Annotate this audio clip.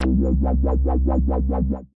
Wub wub wub
Sounds like a force shield that is blocking something. This was created in FL Studio 9.